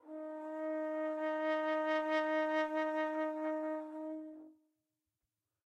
One-shot from Versilian Studios Chamber Orchestra 2: Community Edition sampling project.
Instrument family: Brass
Instrument: Tenor Trombone
Articulation: vibrato sustain
Note: D#4
Midi note: 63
Midi velocity (center): 63
Room type: Large Auditorium
Microphone: 2x Rode NT1-A spaced pair, mixed close mics